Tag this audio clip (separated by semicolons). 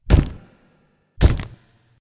hit; punch; punches